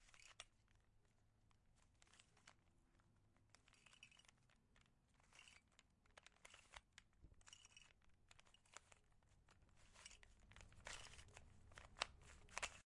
This is the sound of someone playing with a Rubiks Cube. Recorded with Zoom H6 Stereo Microphone. Recorded with Nvidia High Definition Audio Drivers.
Metal, Toy, RubiksCube, OWI, Spring